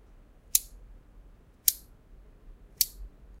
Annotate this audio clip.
stiletto - slow
Slow Up stiletto
compact, knife, pocket, stiletto